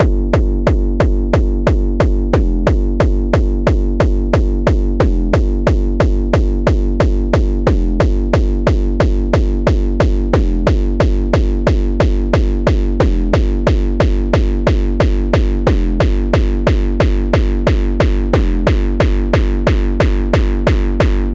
distiorted kick1
distortion, hard, hardcore, hardstyle, kick, tekno